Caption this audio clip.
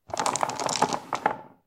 dice24shuffle
Dice sounds I made for my new game.
dice, roll, shuffle, rolling, stones, die